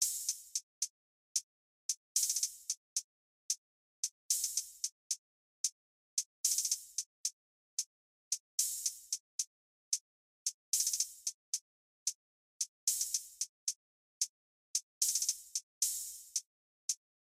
Hi-Hat Loop 5 (112 bpm)
Hi-Hat loop at 112 bpm. Good for hip-hop/rap beats.